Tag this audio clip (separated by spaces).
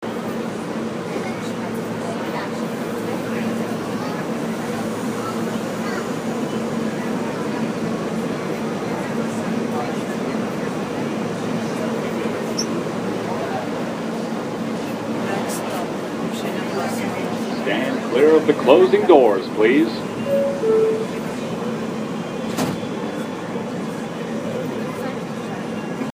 metro subway mta NYC new-york PA field-recording